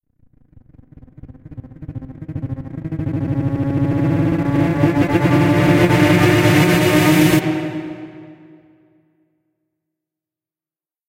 a weird uplifter i made with fruity granulizer.
i took this from my deleted sample pack called musicom's samplebox vol. 1 OK.
transmission, uplifter, weird, riser, fx